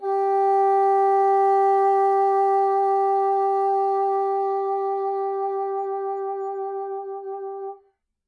One-shot from Versilian Studios Chamber Orchestra 2: Community Edition sampling project.
Instrument family: Woodwinds
Instrument: Bassoon
Articulation: vibrato sustain
Note: F#4
Midi note: 67
Midi velocity (center): 95
Microphone: 2x Rode NT1-A
Performer: P. Sauter